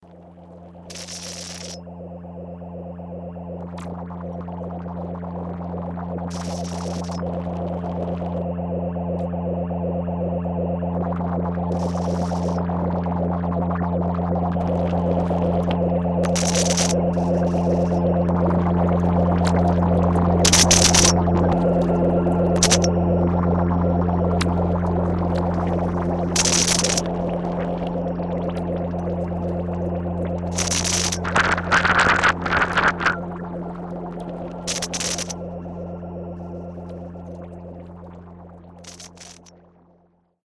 franks lab
electrical ambience from Frankensteins Lab